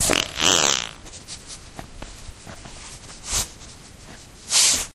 DOG SMELLS MY FART
My dog decided to sniff my fresh fart. Her Demise, for sure
dog, sniff, fart, weird, flatulence, flatulation, explosion, poot